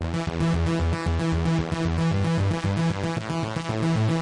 Analog arp sequence 2
A short, loopable arp sequence made with a minimoog vst.
analog, arp, loop, minimoog, moog, sequence, vst